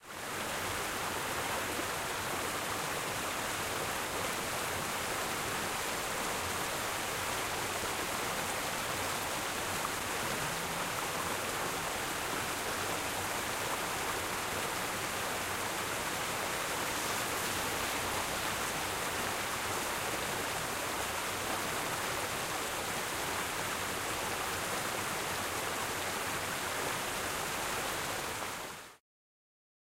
Small River Flowing Next to Street
The area is very close to the magical small village of Krippen, Germany. The river flows undisturbed - at some point though you can hear a car passing by. Its tires interact with the wet asphalt creating yet another interesting sound effect.
water, street, small-river-flow, river